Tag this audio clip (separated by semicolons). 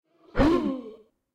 Blood; Monster; Wood; Fist; punch; Kick; Push; Hurt; Tile; Animal; Hit